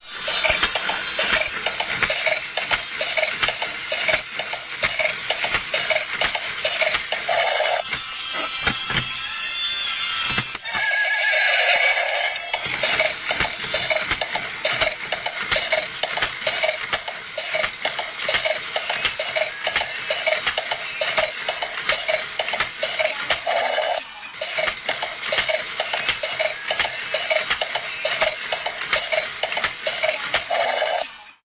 19.12.2010: about. 20.40. horseman toy. Carrefour supermarket in Poznan. Franowo Commercial Center.